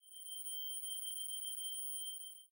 Just a simple "square" sound with some effects.